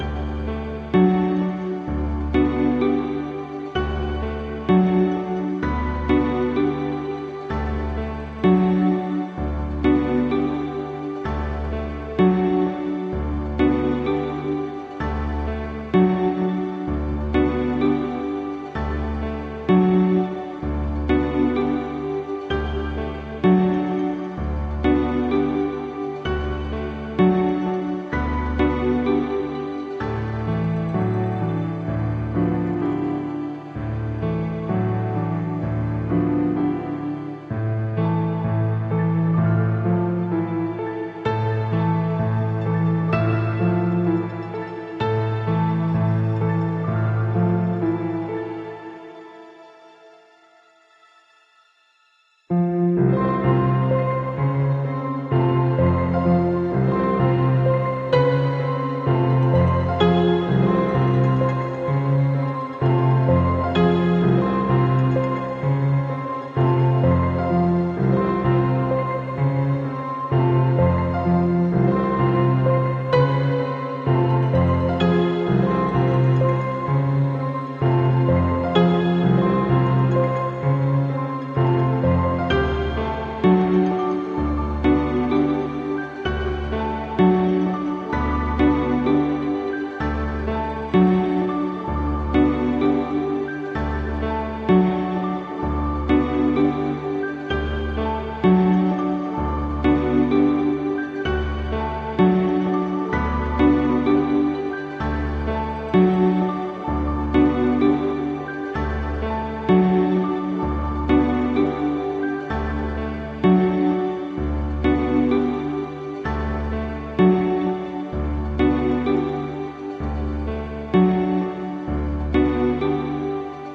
Piano and effects track loop.
Instruments and synths:Ableton live,kontakt.
atmosphere, cinematic, classical, dramatic, effects, film, finale, game, interlude, intro, loop, melancholic, movie, music, orchestral, original, outro, Piano, romantic, sad, slow, solo, soundtrack, track